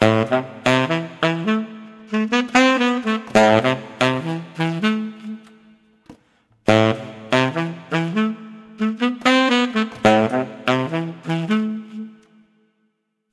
DM 144 AMin SAX LINE
DuB HiM Jungle onedrop rasta Rasta reggae Reggae roots Roots
rasta, onedrop, HiM, DuB, reggae, roots, Jungle